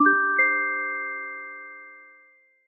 Correct Menu Game Android
win, lose, timer, gui, click, achievement, buttons, mute, end, uix, clicks, menu, correct, ui, sfx, event, bleep, blip, button, puzzle, bloop, application, game, beep, startup